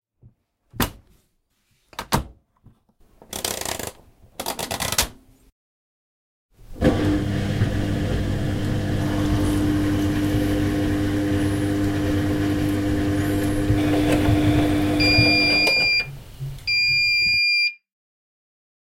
KitchenEquipment WashingMachine Mono 16bit
messing with the washing machine